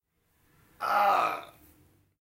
29) Man agonyzing
foley for my final assignment, agony noises (my chest wanted to explode for no reason so I recorded this)